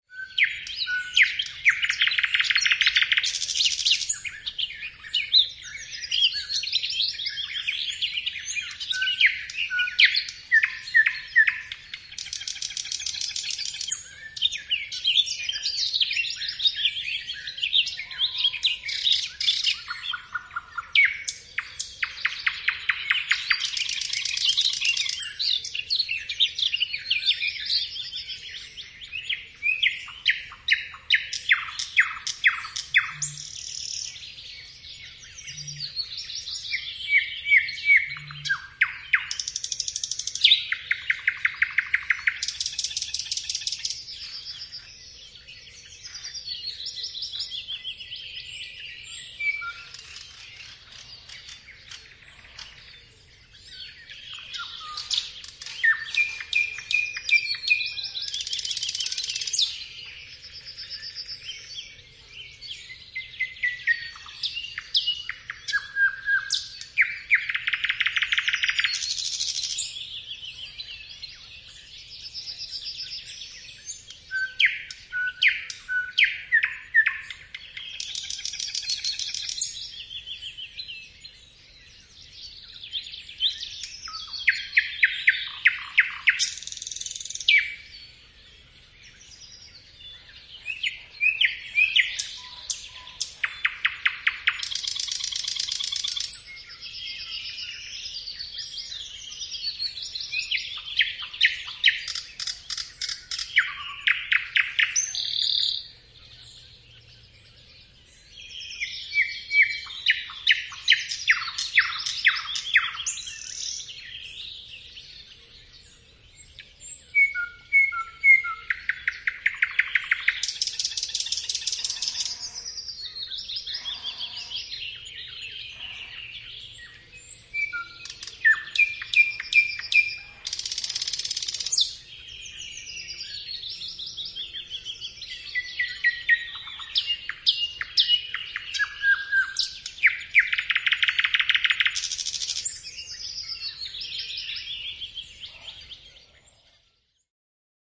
Metsä, saari, pikkulinnut laulavat kauniisti ja vilkkaasti kesällä saaressa, satakieli etualalla.
Äänitetty / Rec: DAT | Paikka/Place: Suomi / Finland / Lohja, Lohjanjärvi
Aika/Date: 06.06.1994